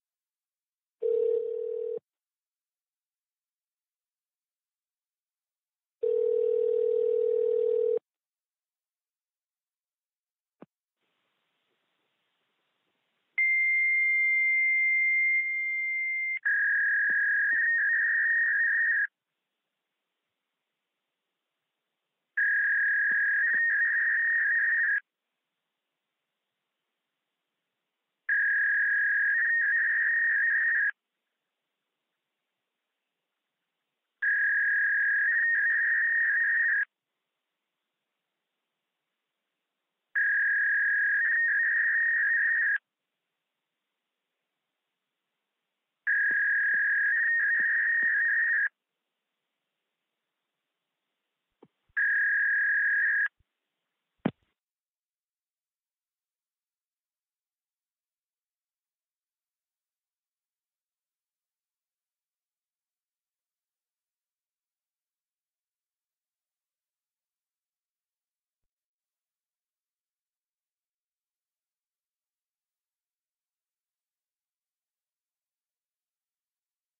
dial-up
I guess I put in the wrong number for my orthodontist?
Recorded like this, it was kinda ridiculous but very fun:
iPhone -> 1/8" to RCA cable -> used one of the RCA outputs -> RCA to 1/4" adapter -> Scarlett 2i2 -> ProTools
dial, dial-up, fax, machine, up